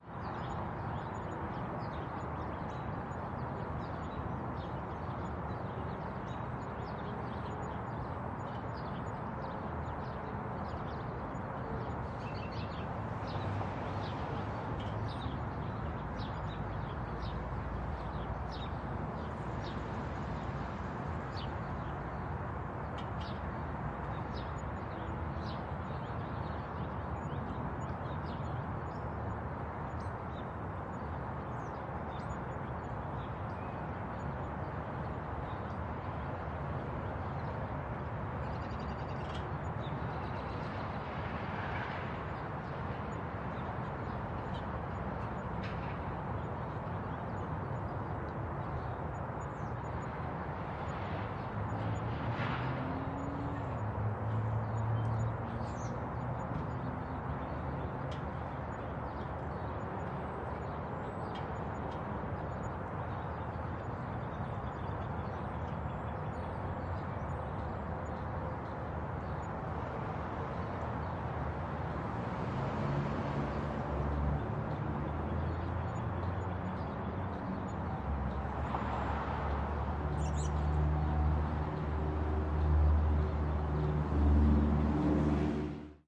Blue collar suburb with birds, distant highway and trains
Recorded in a suburb of Baltimore.